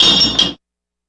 A clank/crash noise. From the creator of "Gears Of Destruction".
crash, mechanical, machine, break, industrial, factory